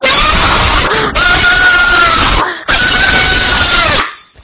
A woman screaming.
666moviescreams, pain, scream, strong, woman